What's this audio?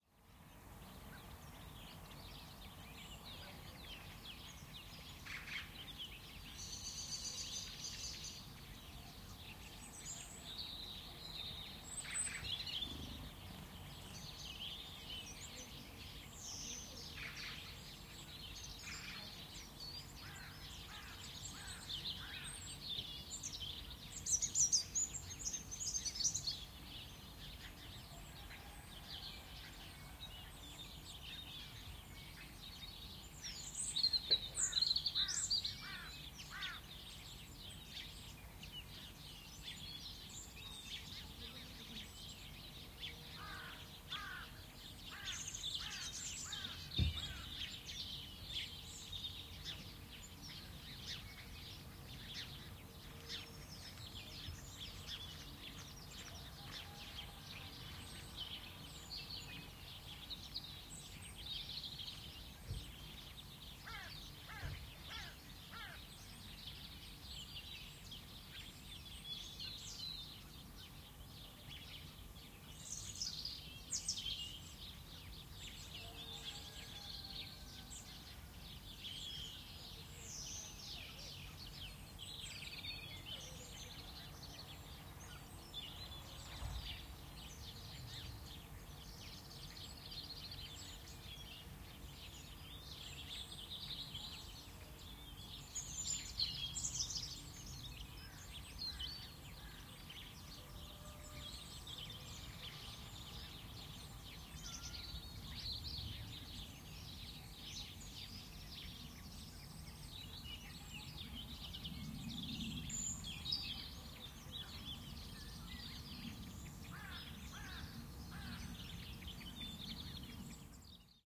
amb - outdoor birds crows
ambience, ambiance, ambient, soundscape, nature, galiza, field-recording, crows, outdoor, birds